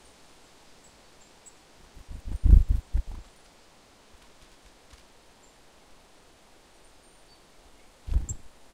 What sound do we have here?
Low frequency sound of the bird wings on landing and taking off. Noise of the forest.
Recorded: 2013-09-15.
XY-stereo.
Recorder: Tascam DR-40